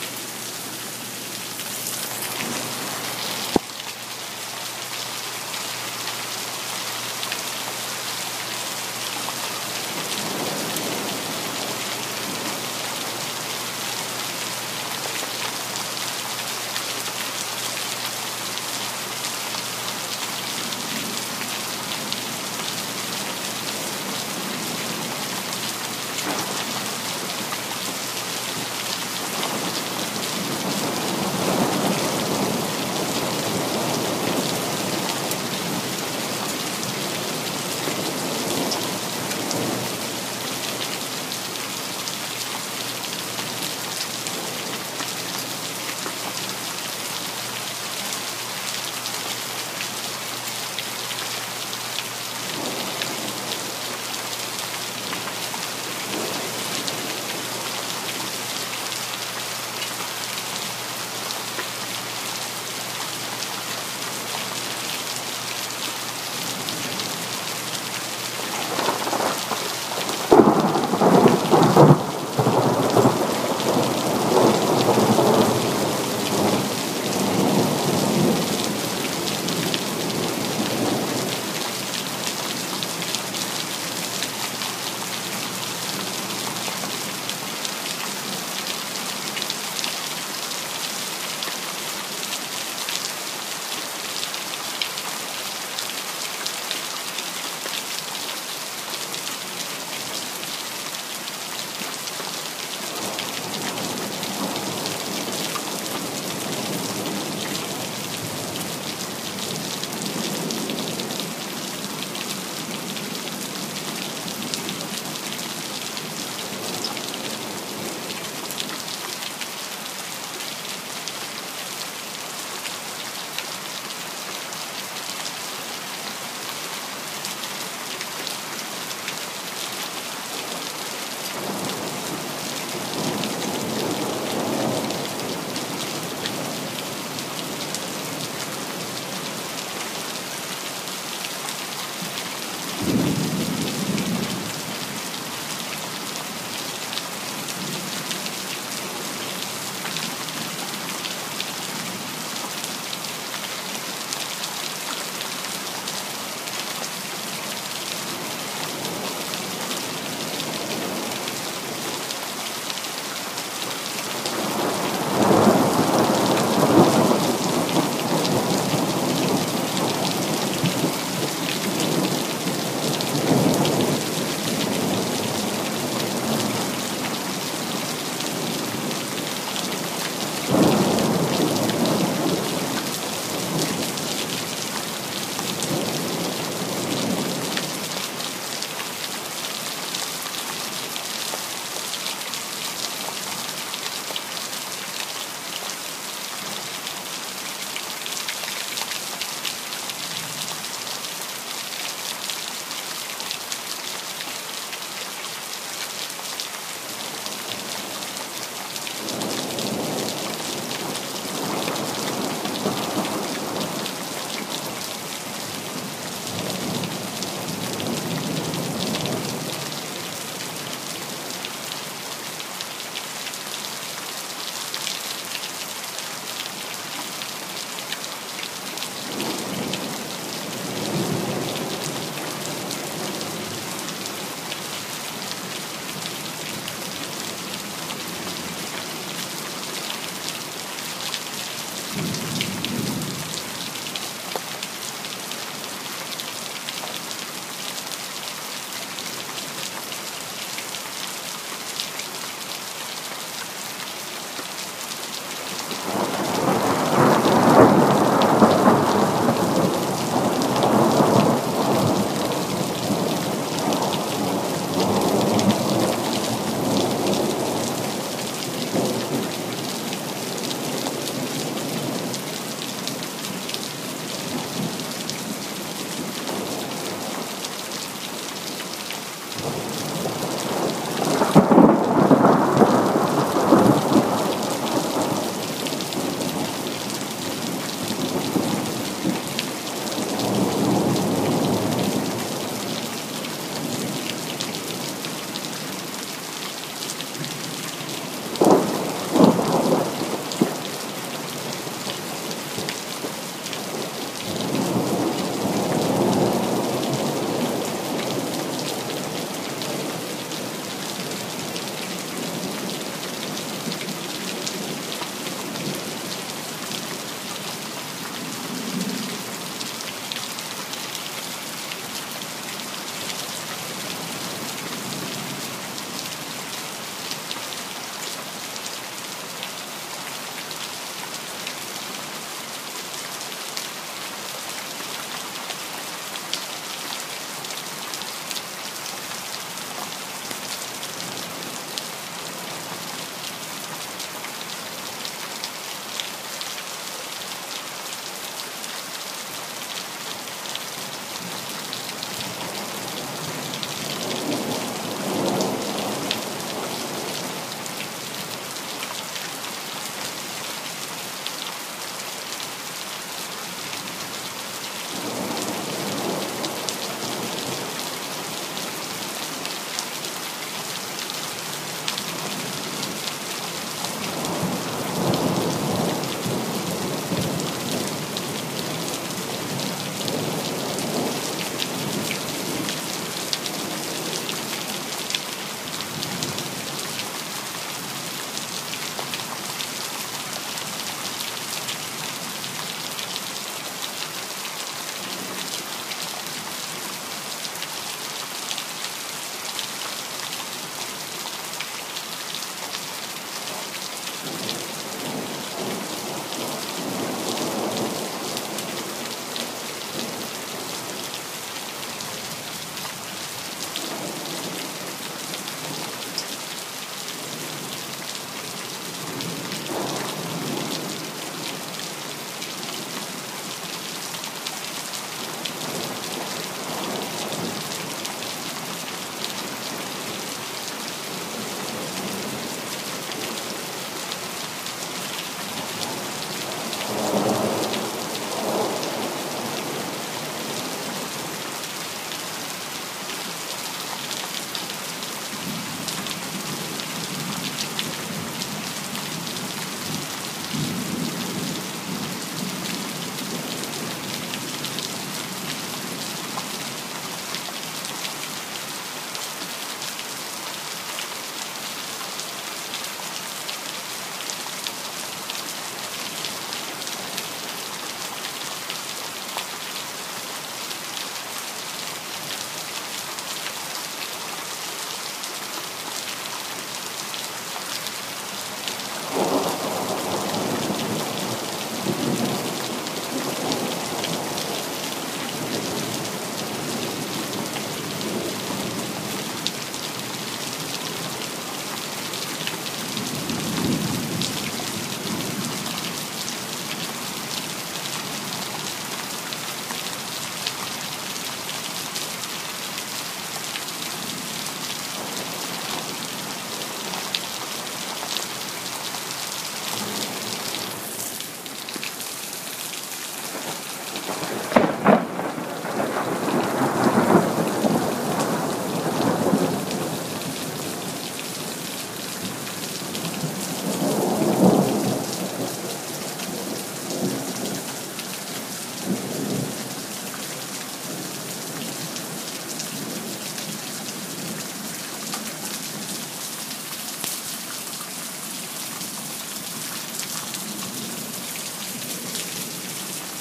Nine minutes of a strong thunderstorm with heavy rain and frequent thunder, recorded on the back patio of my house. The quality is pretty good. You don't hear any cars or other background noise, just lots of rain and thunder.
Recorded by students and/or faculty of Southwestern Illinois College, Belleville, Illinois